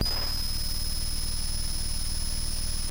Electronic hum/buzz noises from the Mute Synth 2.
analogue, buzz, electronic, hum, Mute-Synth-2, Mute-Synth-II, noise